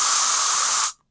spray stop
Recorded in a bathroom with an Android Tablet and edited with Audacity.
bathroom, drain, drip, flush, flushing, pee, plumbing, poop, restroom, spray, squirt, toilet, water